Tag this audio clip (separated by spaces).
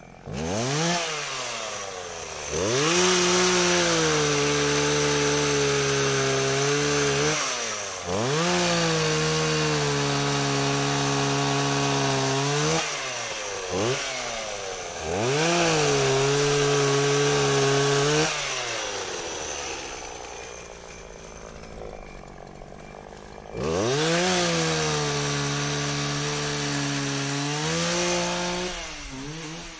nearby sawing